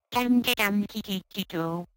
FrankenFurby DumDeDumDeDeDeDo
Samples from a FreakenFurby, a circuit-bent Furby toy by Dave Barnes.
circuit-bent, electronic, freakenfurby, furby, glitch, toy